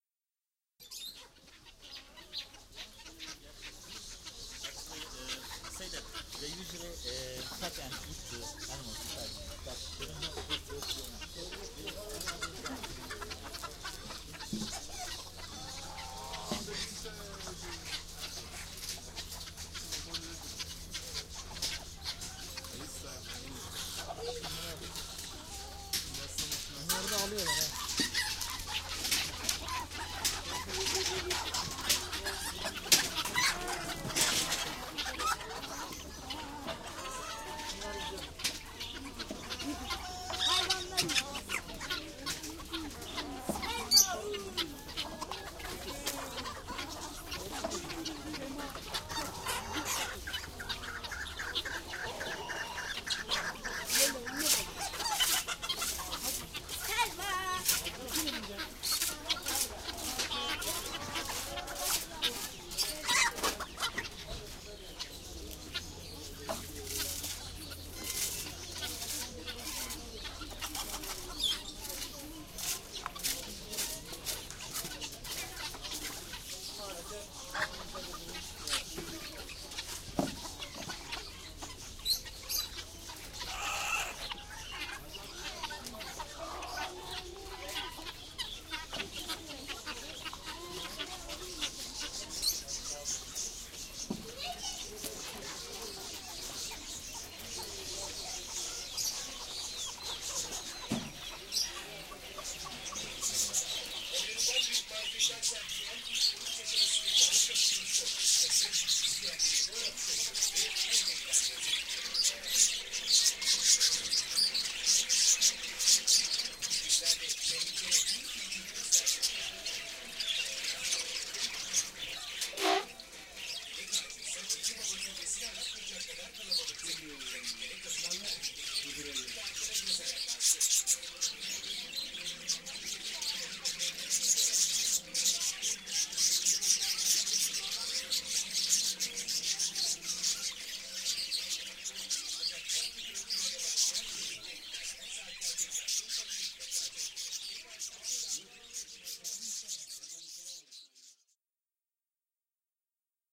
In Istanbul .Animal market
Recorded while walking thru.
people, soundscape, recording